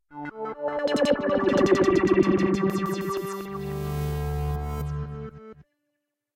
Synth In
Recorded from the Synthesizer
intro, synthesizer